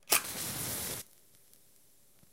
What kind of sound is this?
Sound of a matchstick being lighted on fire.
perfect, match, matchstick